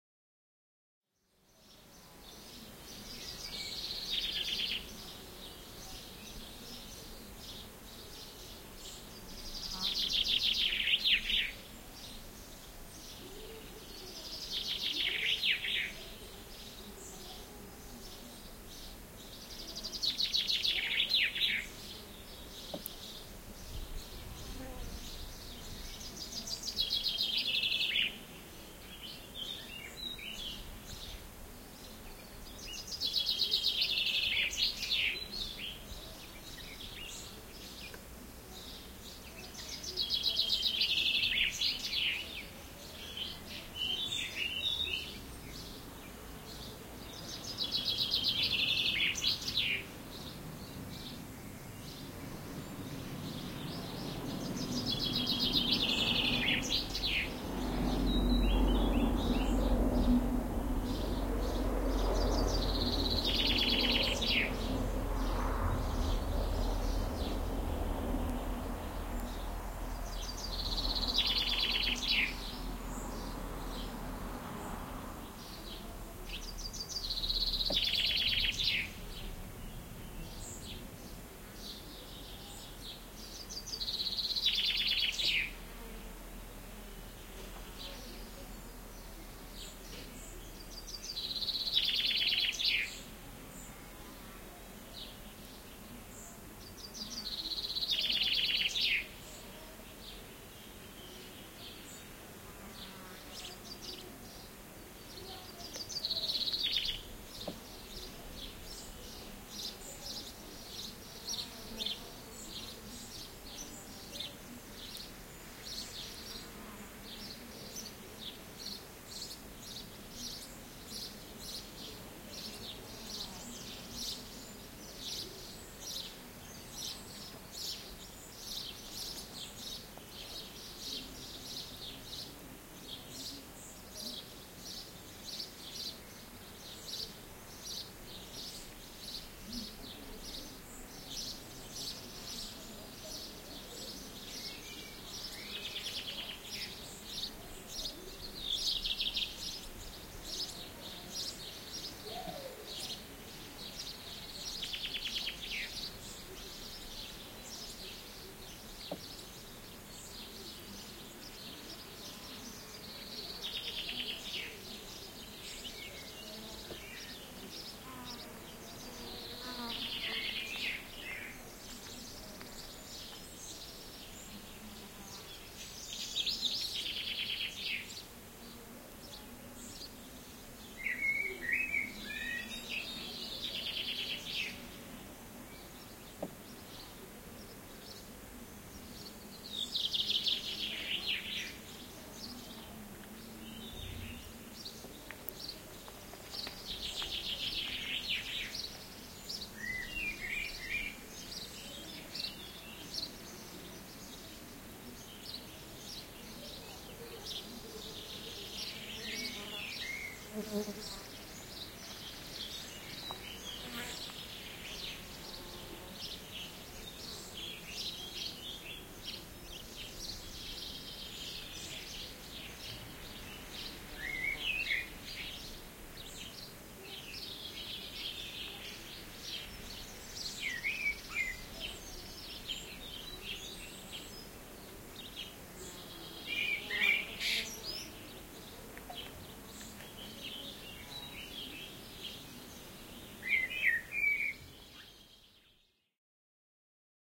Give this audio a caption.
This is a field recording in a village of 12 inhabitants, called Urgueira, belonging to the municipality of Águeda near the Serra do Caramulo in Portugal.